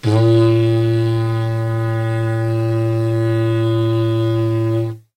Wrapping paper center tube, blown perpendicular to true north at a 47 degree rising angle recorded direct to PC via cheap realistic dynamic mic with one eye closed at 71 degrees Fahrenheit.
cardboard, didj, doot, horn, trumpet, tube